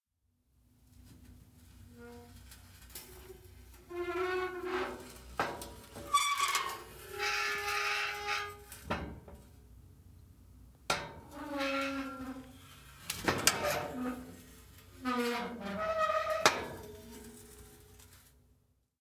Metal Gate 01
Metal gate on a trailer opening and closing.
closing, creak, gate, metal, opening